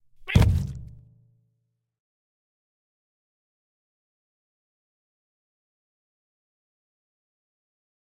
41 hn catexplodes
Cat exploding. Made with cardboard box, bottle with liquid and fake cat sounds.
cat, cartoon, explosion